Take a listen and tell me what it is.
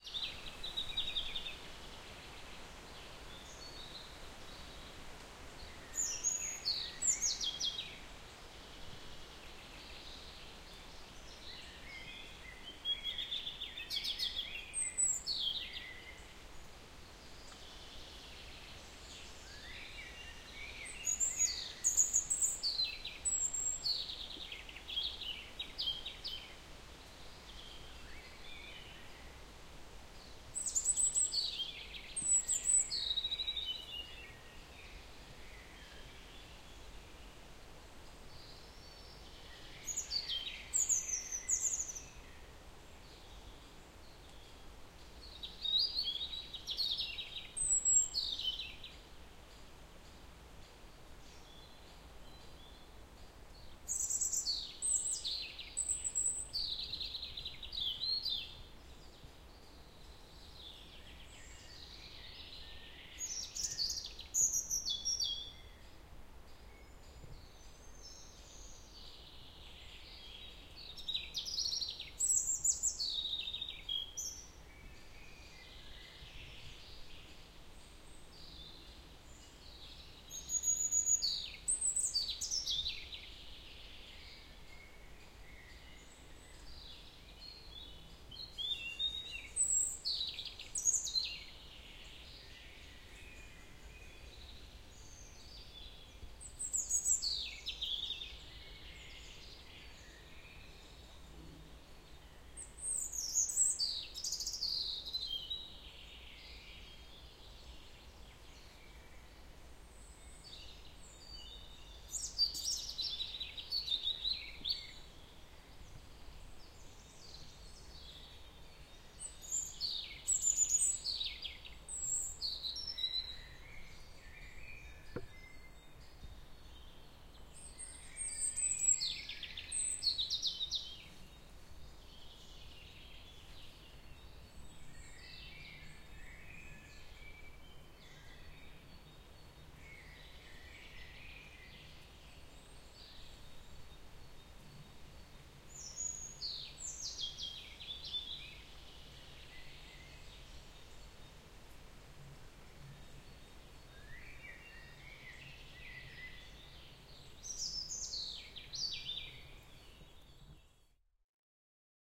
Forest [Chojnów Landscape Park, Poland, 2020-05-30] - recording of the forest soundscape with the singing birds.
Las [Chojnowski Park Krajobrazowy, Polska, 2020-05-30] - nagranie aury dźwiękowej lasu z śpiewem ptaków.
bird, birds, birdsong, field-recording, forest, nature, sound, spring